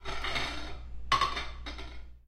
Sounds of forks, knives and plates clashing
clank, dinner, dish, dishes, eating, fork, knife, plate, porcelain